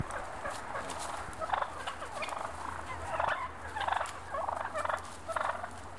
This is a group of male turkeys fighting. There are a variety of different sounds produced by the birds. I could not get the wind noise out of the recording. This was recorded using the built-in stereo mics on a Zoom H4n Pro Handy Recorder.